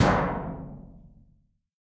Plat mŽtallique gong mf crt
household, percussion